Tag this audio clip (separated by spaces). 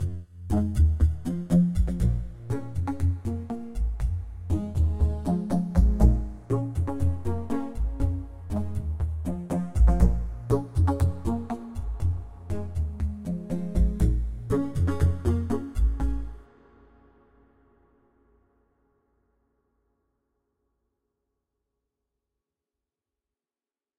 rythm synth